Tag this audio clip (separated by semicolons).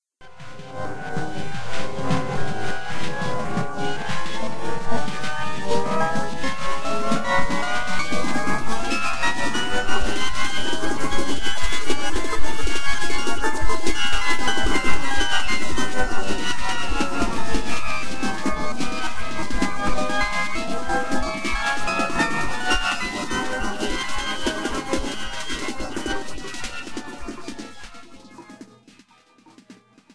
betaeight; modulated; mutated; phasor; reverse